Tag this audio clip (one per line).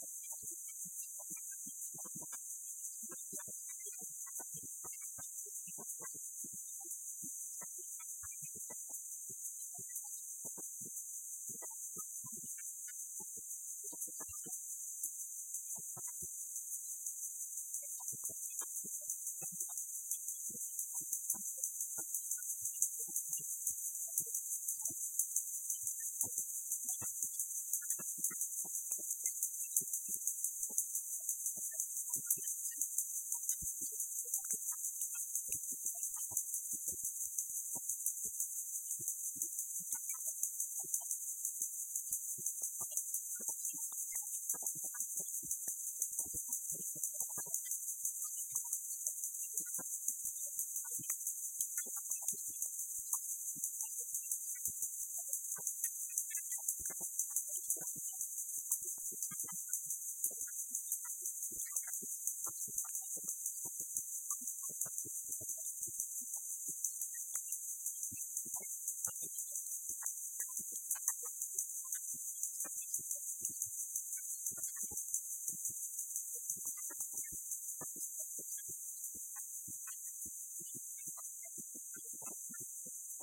field-recording
Hum
industrial
machine
machinery
mechanical
MOTOR
Operation
POWER